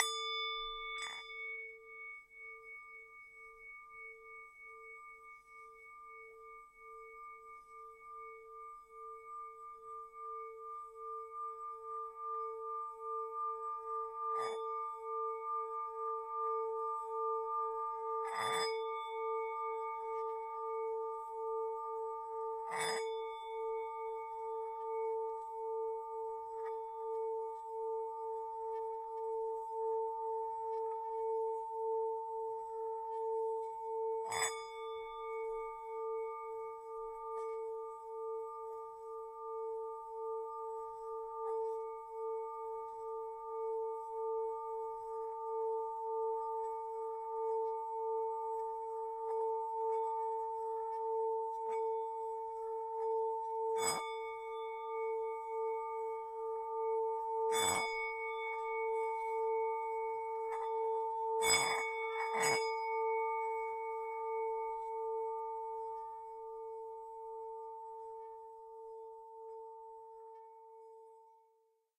Singing bowl sample